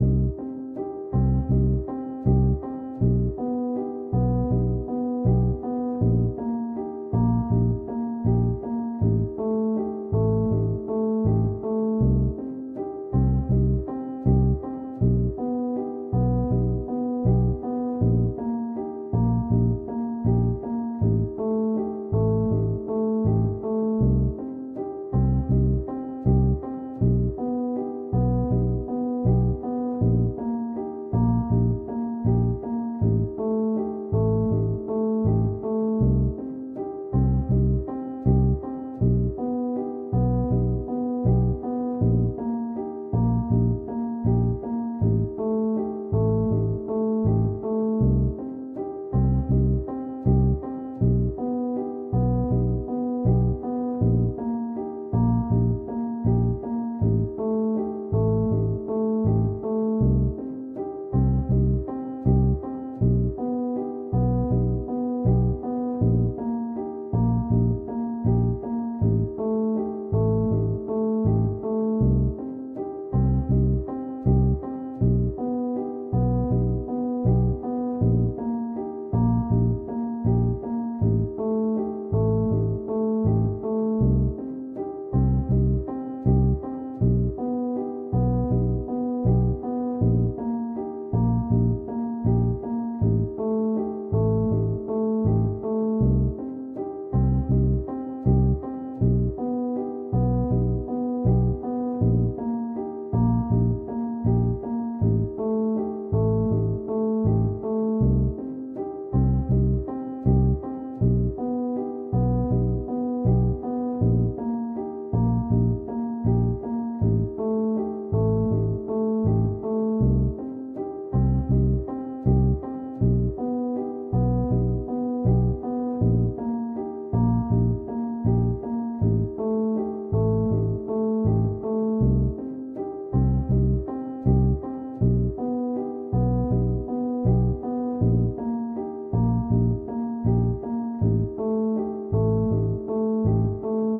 Dark loops 003 simple mix 80 bpm
80, 80bpm, bass, bpm, dark, loop, loops, piano